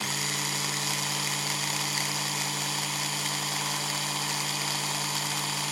Something with a motor
Drill, Power, Tool